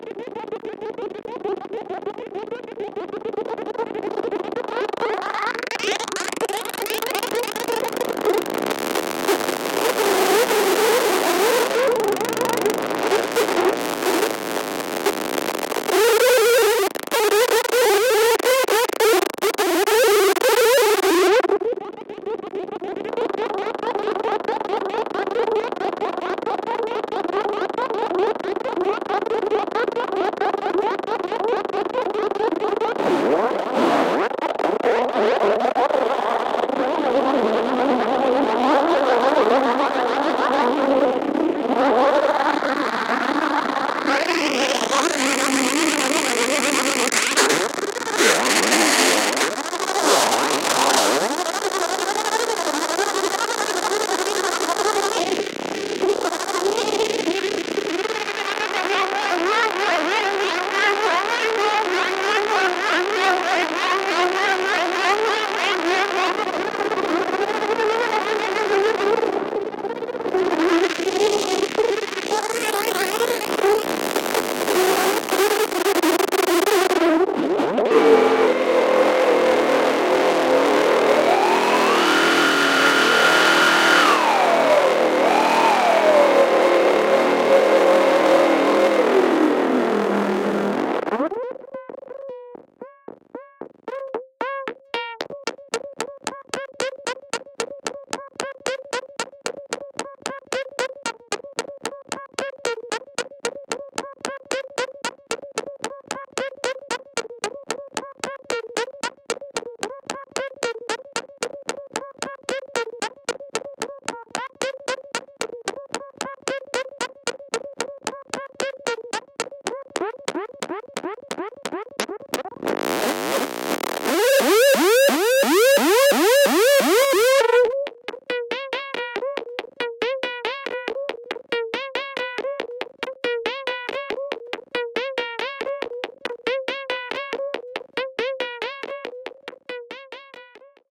Blabber Glitch
Strange changing voice-like glitchy sounds devolving and becoming a quirky beat, made on the VCV Rack modular synth by modulating the volume and delay of an oscillator with another oscillator, and vice-versa.
beat, digital, electronic, glitch, harsh, modular, noise, synth, voice